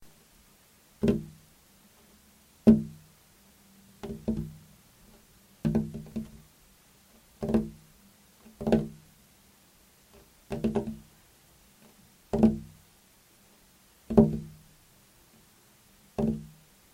Tapping, Window, Multi, A
Several multi-hits of a finger on a glass window.
An example of how you might credit is by putting this in the description/credits:
Panel, Multiple, Hits, Multiples, Pane, Bang, Taps, Knock, Tap, Multi, Hit, Windows, Window, Glass, Bash, Tapping